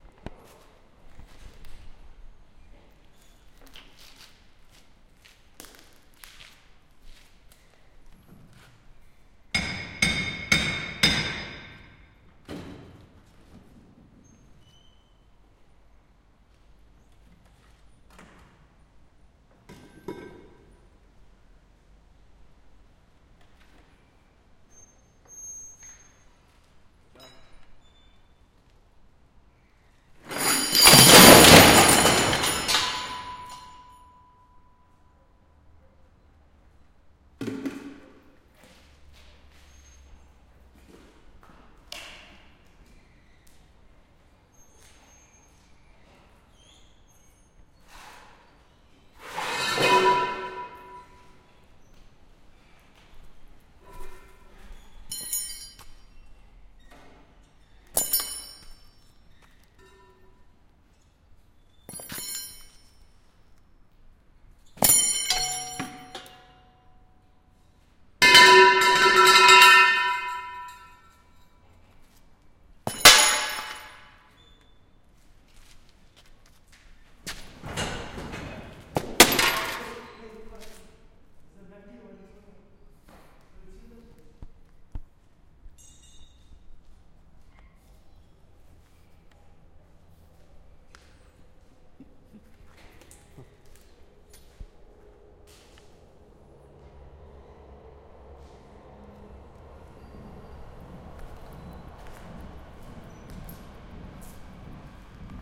Foolin around with pieces of metal in a trainyard hall in Sweden.
clipping, hall, hit, metal, sweden, train